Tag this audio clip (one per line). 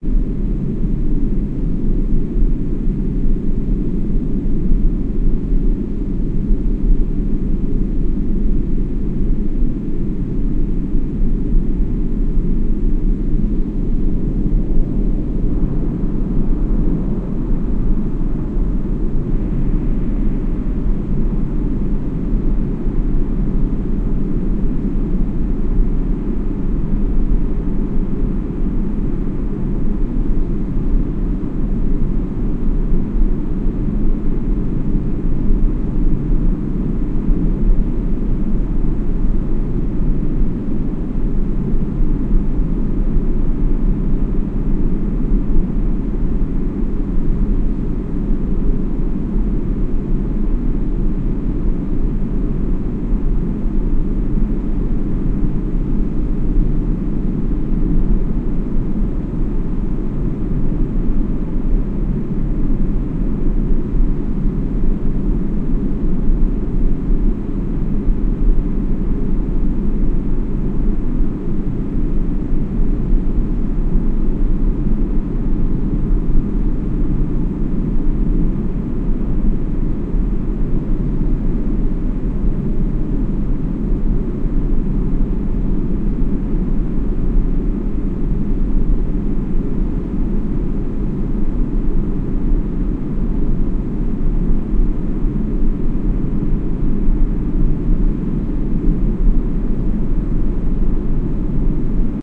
aeroplane
aviation
airplane
aircraft